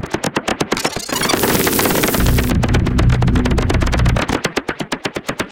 the result of some heavy duty processing in Adobe Audition and Native Instruments Reaktor
loop, industrial, electronic, percussion, noise, dark, sound-design, rhythmic, 2-bars